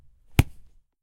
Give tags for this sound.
sport
world-cup

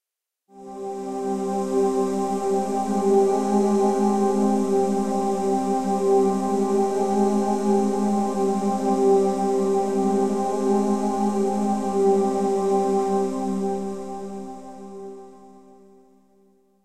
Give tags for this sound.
drama
cinematic
drone
atmosphere
space
thiller
spooky
deep
sci-fi
thrill
ambient
background-sound
music
mood
pad
soundscape
film
scary
trailer
hollywood
horror
background
dark
ambience
suspense
dramatic
movie

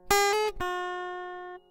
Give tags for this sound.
oneshot
western

